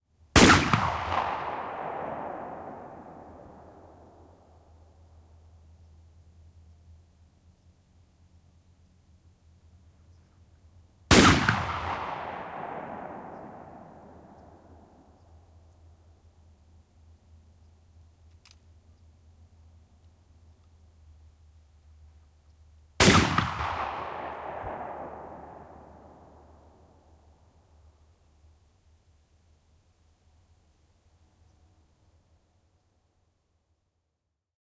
Jykeviä laukauksia, ampumista ulkona. Kaikua. Yksittäislaukauksia.
Paikka/Place: Suomi / Finland / Vihti, Leppärlä
Aika/Date: 12.10.1988